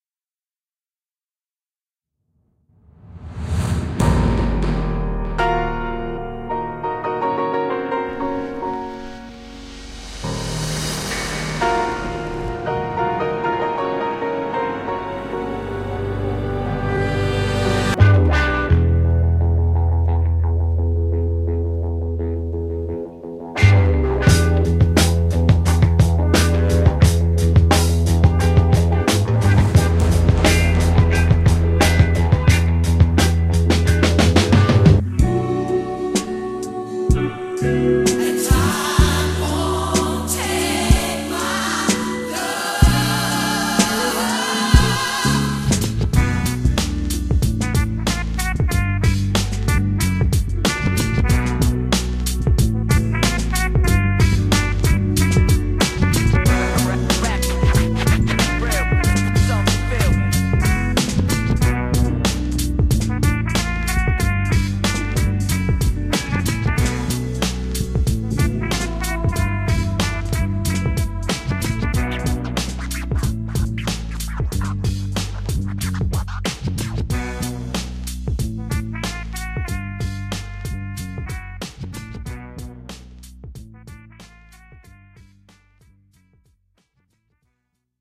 Manipulation of multiple effects on audiop track
compression, filtering, heavy